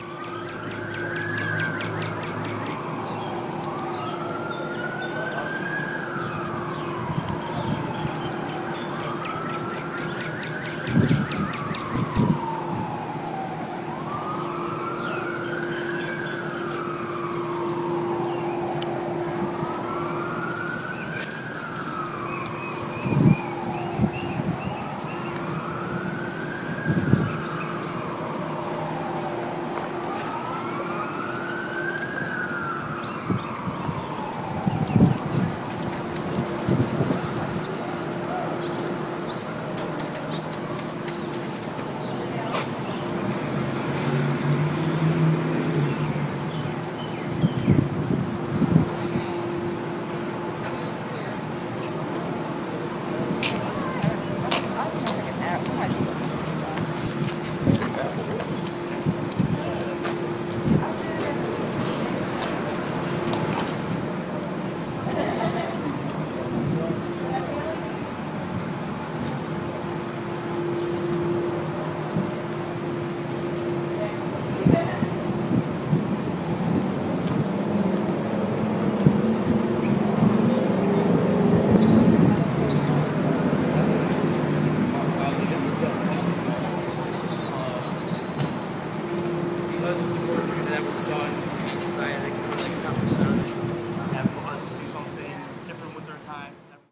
A soundscape recording of a common area at a major university during class switches. You'll hear distant, muted conversations, wind, some birds oblivious to the rigors of academic life, and distant laughter and steps.
Recorded in April 8th, 2013 using my Zoom H4N recorder and utilizing its built-in stereo microphones.
day-time-ambiance, traffic, walking